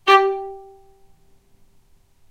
violin spiccato G3
spiccato, violin